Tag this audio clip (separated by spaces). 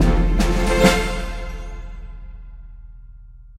achievement celebrate complete epic fanfare fantasy game gamedev gamedeveloping gaming indiedev indiegamedev jingle levelup rpg sfx success video-game videogames win